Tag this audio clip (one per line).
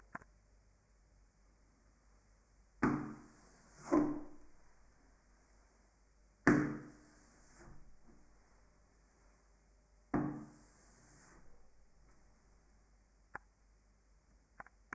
wipe
clean